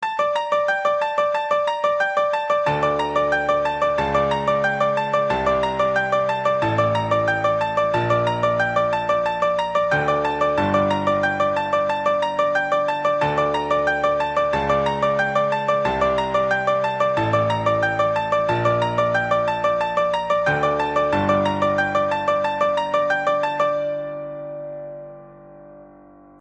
Worry piano
grand, bass, scary, piano, keyboard